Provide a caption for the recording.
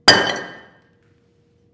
A concrete block dropped from about 1 foot onto concrete floor.
Recorded with AKG condenser microphone M-Audio Delta AP